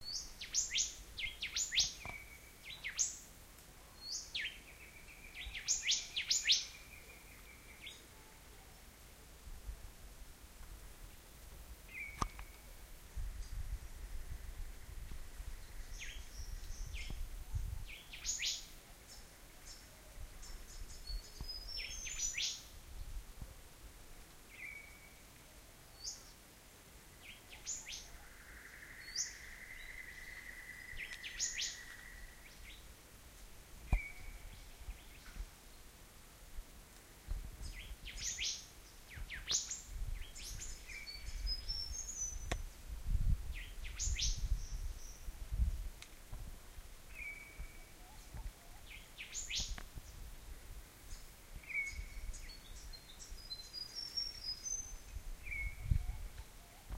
Australian forest birds
Background recording of forest birds in SE QLD
field-recording, bird, australian, nature, birds, forest